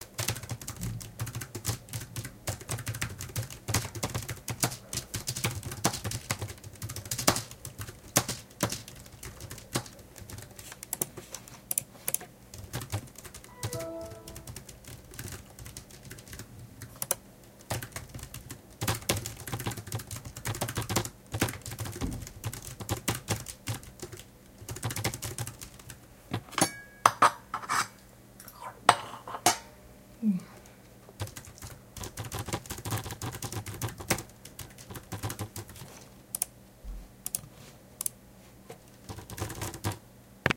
A Day at the Office
Typing, mouse clicks, computer beep, pause to take a bite of food, go back to work. Recorded with a Sony black IC digital voice recorder.